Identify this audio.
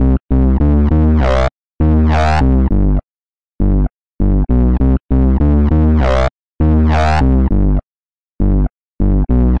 gl-electro-bass-loop-007
This loop is created using Image-Line Morphine synth plugin